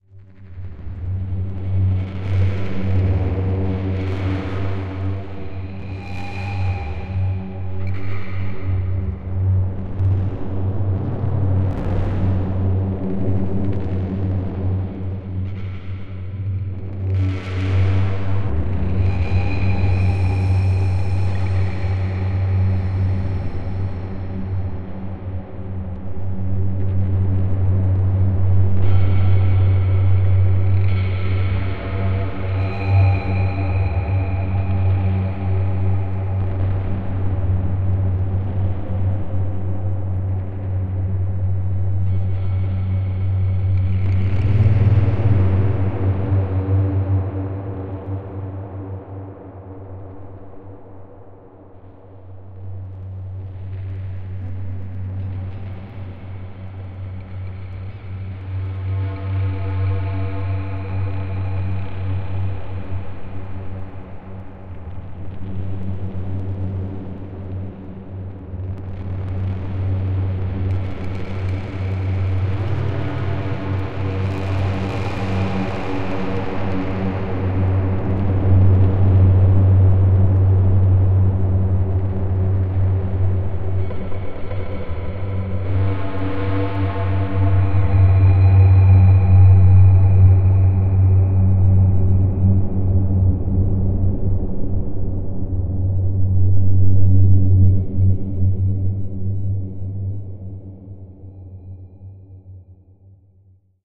Drone OminousDistortion
A dark rumbly drone with intermittent higher frequency elements, all with a distorted flavor. Made with Native Instruments' Metaphysical Function.
Note: you may hear squeaking sounds or other artifacts in the compressed online preview. The file you download will not have these issues.
drone, low, distortion, suspense, dark, scary, horror, rumble, deep, ominous, sci-fi, thriller